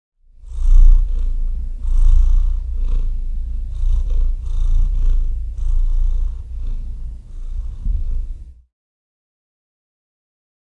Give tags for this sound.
animal
cat
purr
purring

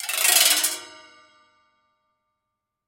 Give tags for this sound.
gliss
marimba
pipes
resonance

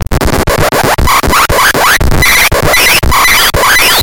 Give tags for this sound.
ring-tone
phone
bytebeat
cellphone
sonnerie
cell-phone
ringtone

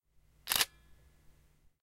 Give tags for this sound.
d3300
nikon
release
snap

